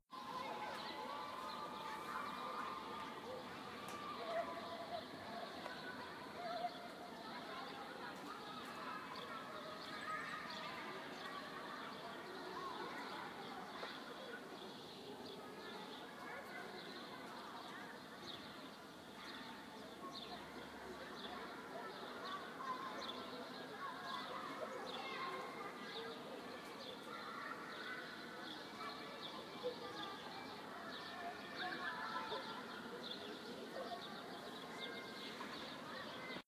A Playground in Berlin, Germany.
Recorded in mono with iPhone 6.